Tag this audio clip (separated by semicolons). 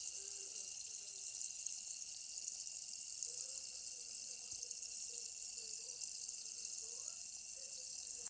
Bubbles; Fizz; Water